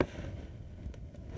Dull metal slide
object, slide, swish, metal, hiss, fabric, cloth